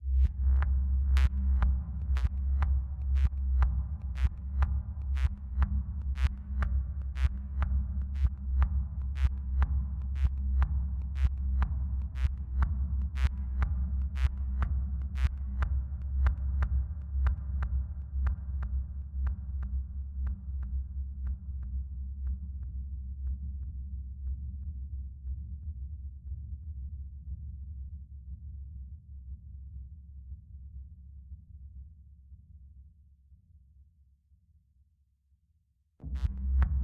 120 bpm C Key 02
lfo, synth, delay, techno, processed, electronic, electro, 120, rhythmic, bpm, distortion, beat, noise, rhythm, experimental, loop